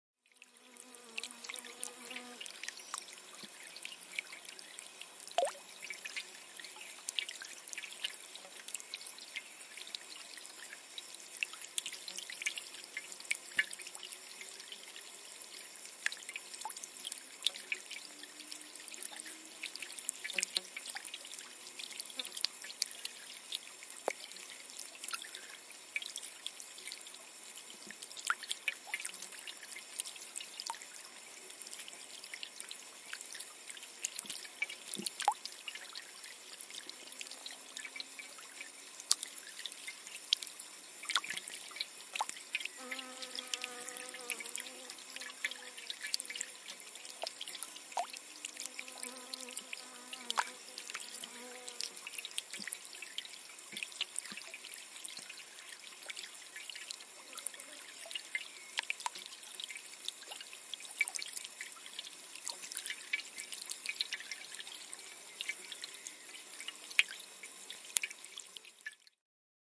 Single small fountain recorded with a Zoom H4